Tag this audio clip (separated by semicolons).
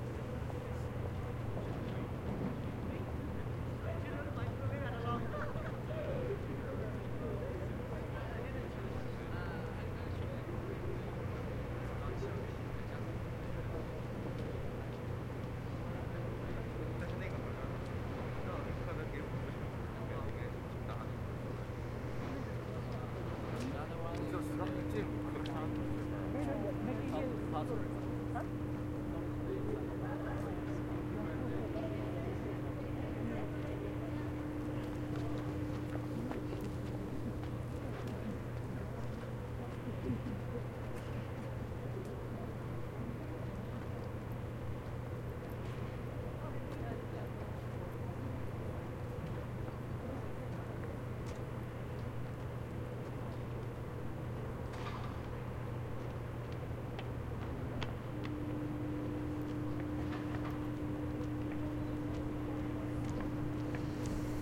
ambience; exterior; field-recording; netherlands; public-building; university; zoom-h2